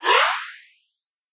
Transition,action,jump,pitch-shift,short,shimmer,whoosh,positive,soft
I created this sound to create an anime like effect with a shimmering like quality. I used FL Studio and some pith and reverb plugins to get this effect.
abstract, future, woosh, sfx, transition, reverb, sound-design, sci-fi, soundeffect, fx, sound, effect